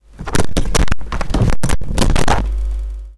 Microphone falls
The sound of a microphone (or in this case, my old headset) being dropped while it's recording.
fall, headset, microphone, tumble